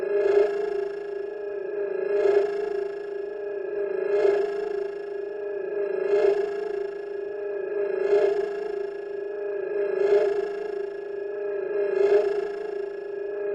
Oscillating electronic machine
Hand created from synths and layering. Enjoy!
alert, alien, electronic, machine, sci-fi, signal, sound-design